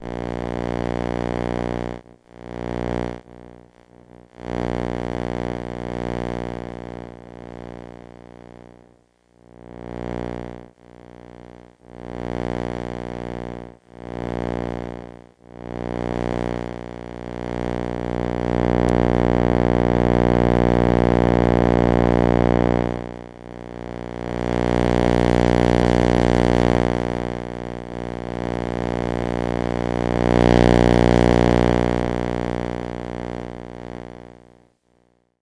Various sources of electromagnetic interference recorded with old magnetic telephone headset recorder and Olympus DS-40, converted and edited in Wavosaur. TV.
electromagnetic, intereference, noise, hum, radiation